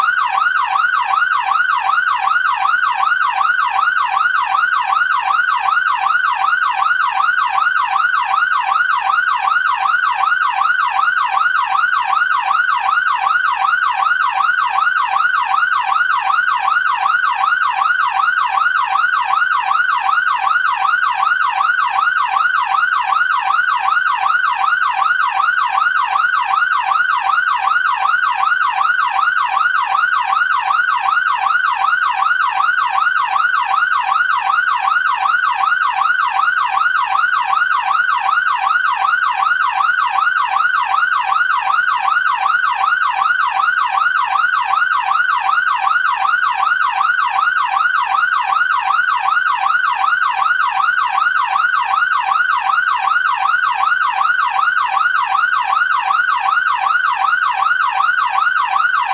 Whelen Yelp
Yelp sound miked directly from the Whelen siren box
car, emergency, fire, police, siren, vehicle, Whelen, yelp